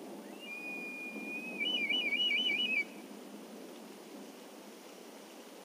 Eagle calling, with wind rumble in background.
bird-calls, eagle, field-recording, nature, scrub, ambiance, south-spain, donana